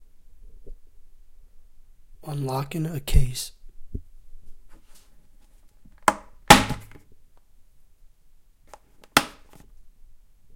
Opening and closing a case
snapping open a case. Recorded with a condenser mic.
case, lock